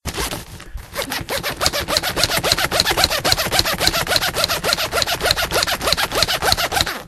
mySound LBFR leslia
Sounds from objects that are beloved to the participant pupils at La Binquenais the secondary school, Rennes. The source of the sounds has to be guessed.
Rennes, pencil, France, Binquenais, my, case, Leslia, sound, La